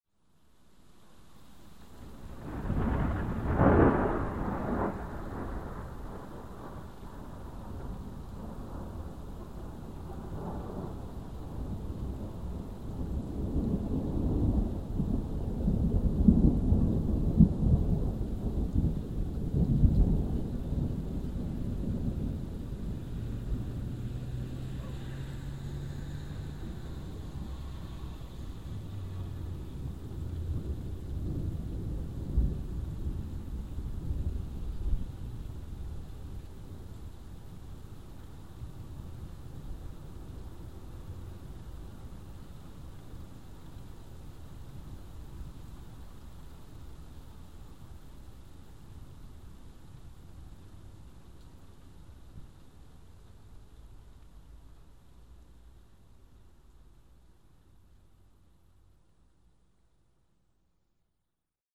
14May2008Closethunderclap02
This thunder sound was recorded on 14th of May, 2008, in Pécel,
just the neighbour city of the capital of Hungary, Budapest. It was
recorded by MP3 player.
thunderstorm, lightning, thunder, field-recording, storm, weather